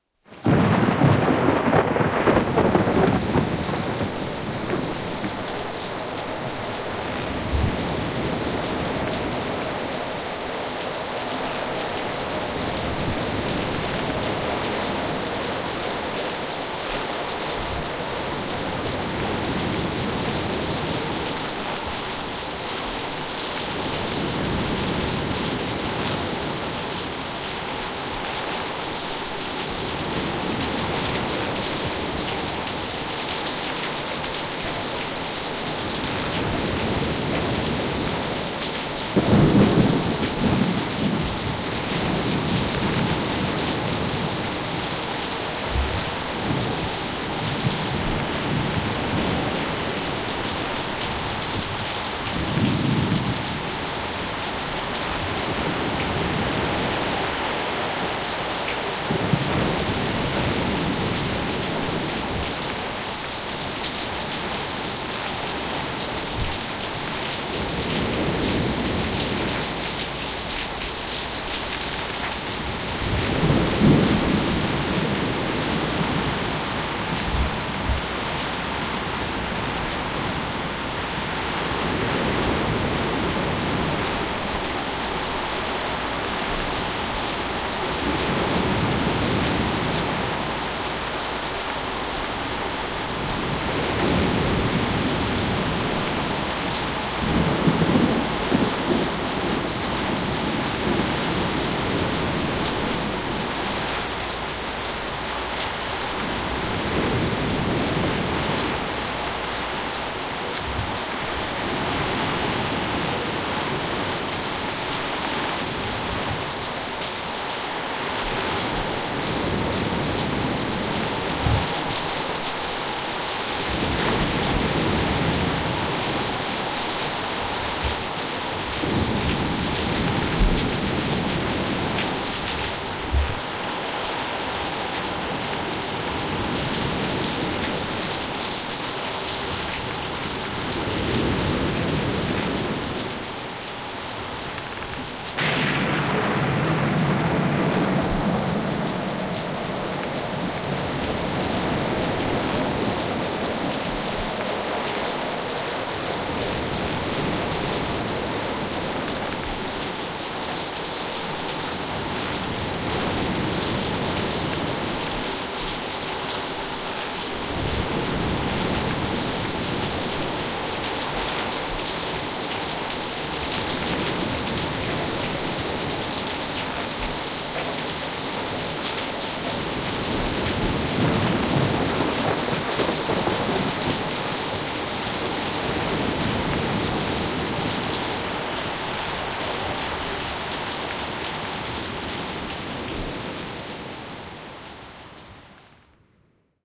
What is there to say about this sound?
A montage, originally made for a production of Shakespeare's "The Tempest" of a storm at sea. Wind, waves, thunderclaps and the sound of creaking timbers.
wind, shipwreck, creak, storm, tempest, waves, sea